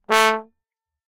One-shot from Versilian Studios Chamber Orchestra 2: Community Edition sampling project.
Instrument family: Brass
Instrument: OldTrombone
Articulation: short
Note: A2
Midi note: 46
Room type: Band Rehearsal Space
Microphone: 2x SM-57 spaced pair
oldtrombone, multisample, midi-note-46, a2, vsco-2, single-note, short, brass